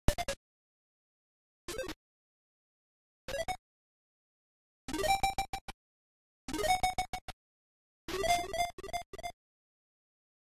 8-bit event sound effects
These are a collection of sound effects made with Famitracker that could be used to signify/emphasize certain events
8-bit, alert, chip, collect, event, game, get, item, open, retro